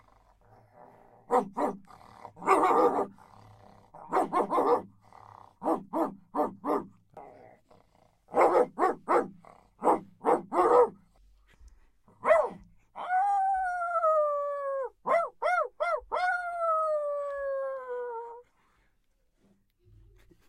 The Shure SM58 Dynamic Microphone and NEUMANN TLM 103 Condenser Microphone were used to represent the sound of human-made dog barks.
Recorded for the discipline of Capture and Audio Edition of the course Radio, TV and Internet, Universidade Anhembi Morumbi. Sao Paulo-SP. Brazil.